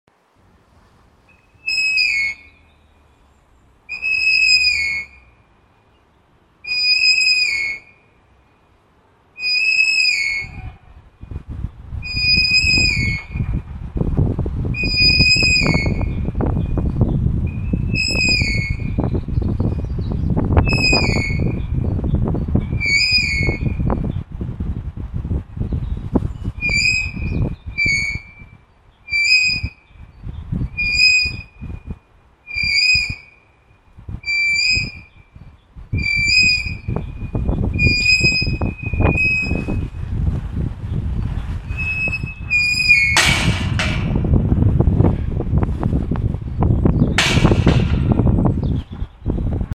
The sound of a squeaky gate (some wind sounds from 1/3 way through) ends with gate crashing closed